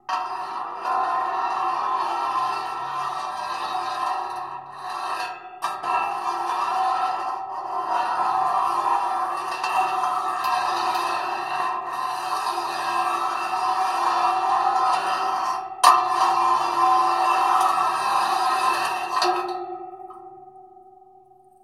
Contact Mic - Metal on Metal 1
A set of keys being scraped against a metal gate recorded with a contact mic